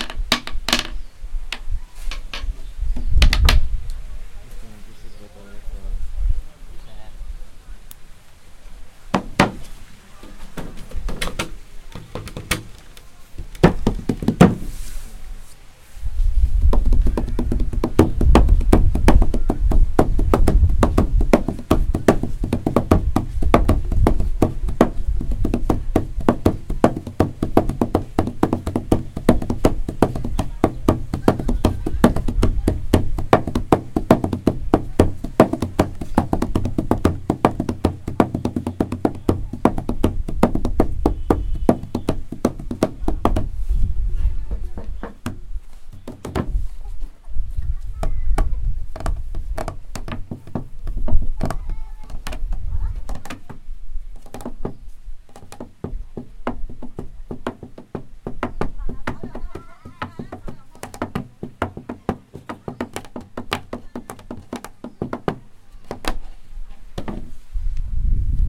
WoodDesk rithm
percussion on a wood desk